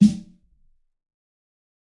fat snare of god 007
This is a realistic snare I've made mixing various sounds. This time it sounds fatter
realistic, kit, god, drum, snare, fat